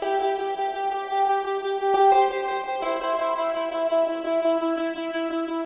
Texas Ranger 004
Electric dulcimer kind of synth part
thin, electronica, high, synth